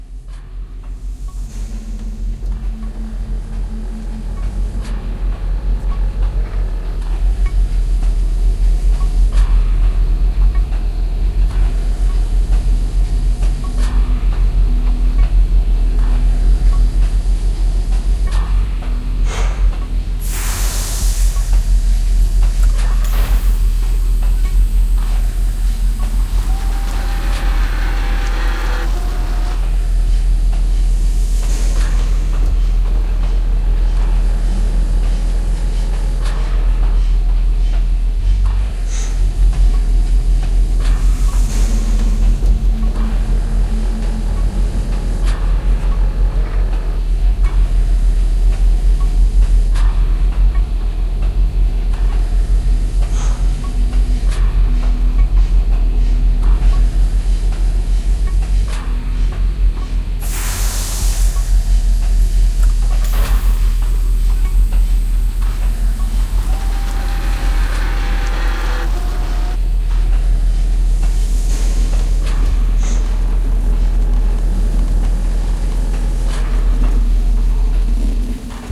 Inside the machine 1
A complex ambiance to illustrate the inside of a steampunk ship.
Factory, Mechanical, Steampunk